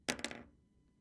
Plastic Thud 2
Recorded on a Tascam DR-100 using a Rode NTG2 shotgun mic.
Plastic thud/bounce that can be used for dropping small objects onto a table or other plastic objects onto a hard surface.